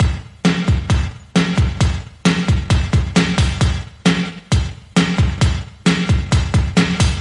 TECHNICS SXK250 DRUMS 4 BARS 133BPM
An old electronic keyboard, the Technics SXK250, provided this drum loop which I recorded onto tape years ago and recently digitized. 4 bars, 133BPM.